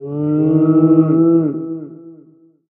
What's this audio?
Organic moan sound